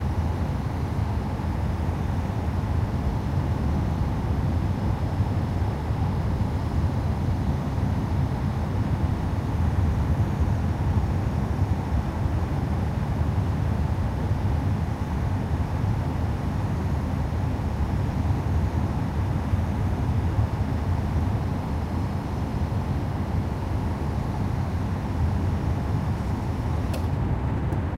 air conditioner
air, air-cooler, conditioner, home-recording, sound-effect